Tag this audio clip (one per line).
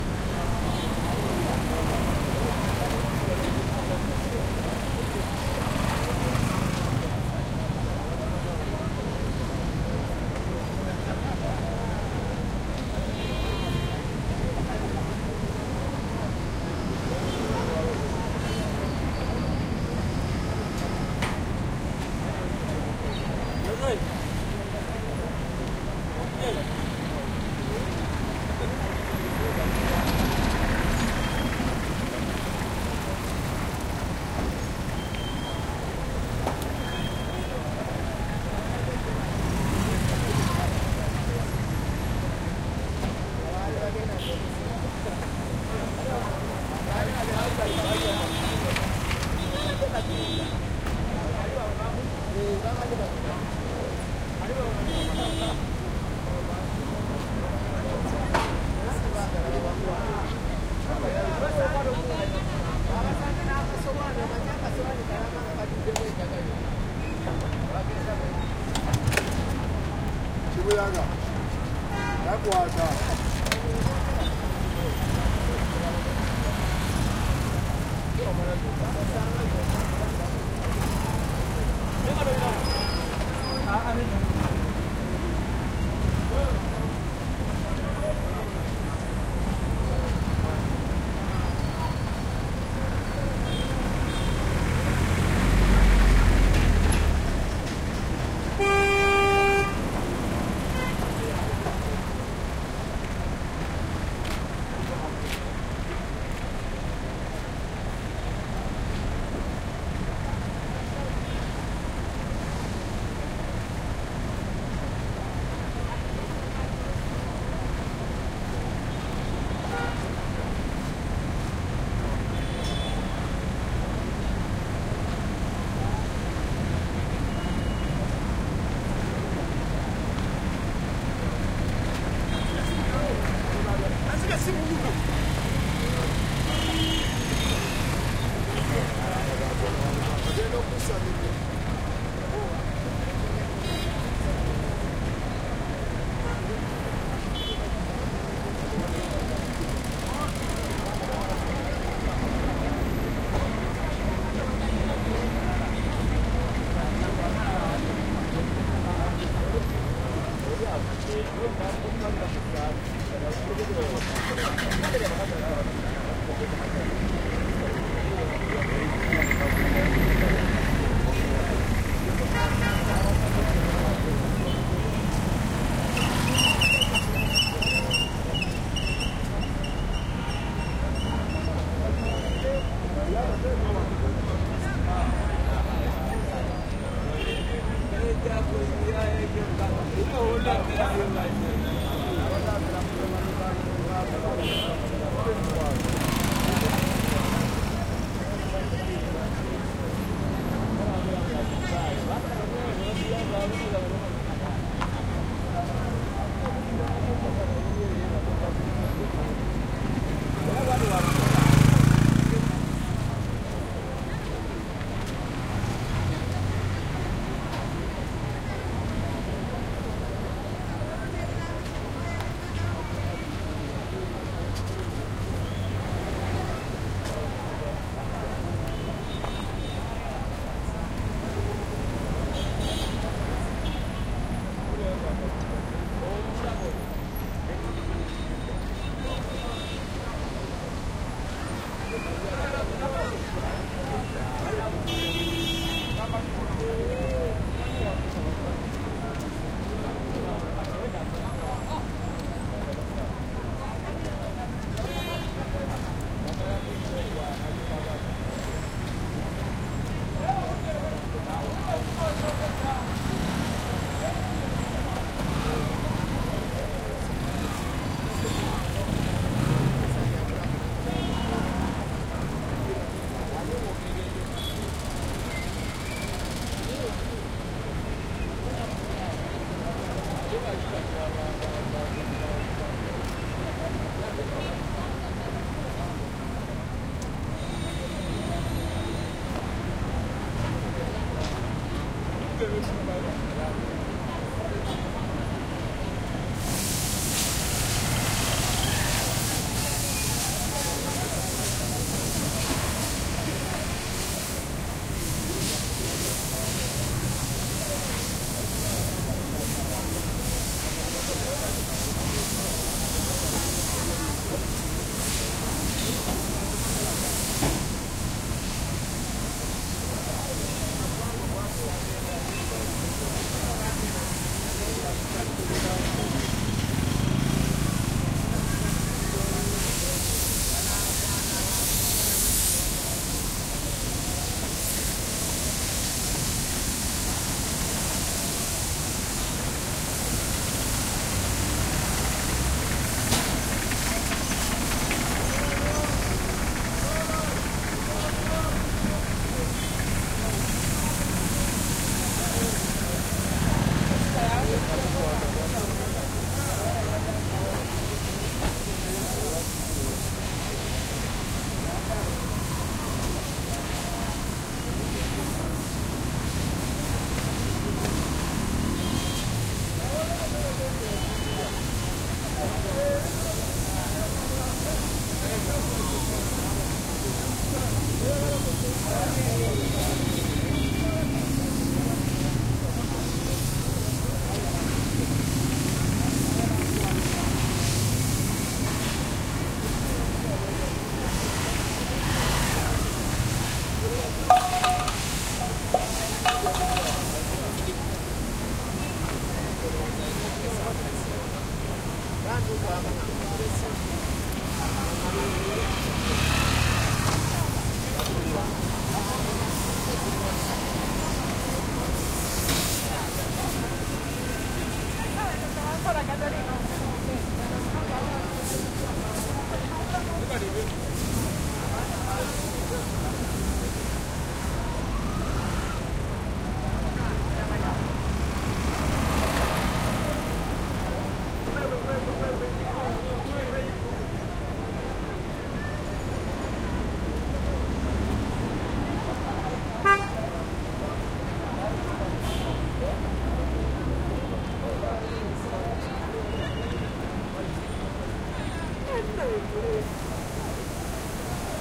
medium
station
traffic
Africa
Uganda
city
gas
slow
crowd